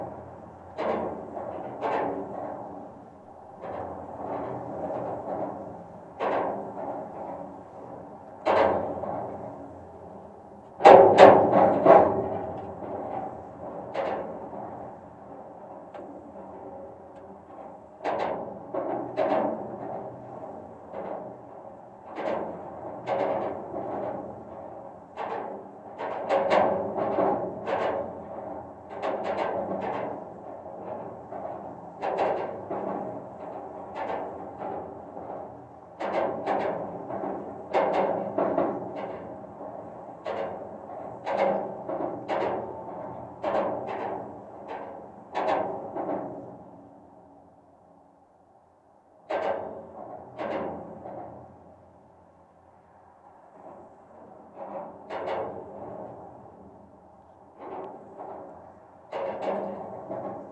GGB A0222 tower NEW
Contact mic recording of the Golden Gate Bridge in San Francisco, CA, USA from the west surface of the east leg of the north tower. Recorded October 18, 2009 using a Sony PCM-D50 recorder with Schertler DYN-E-SET wired mic.
bridge, cable, contact, contact-mic, contact-microphone, DYN-E-SET, field-recording, Golden-Gate-Bridge, metal, microphone, Schertler, Sony-PCM-D50, steel, steel-plate, wikiGong